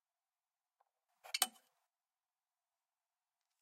one coat hanger, put coat hanger in a metalic suport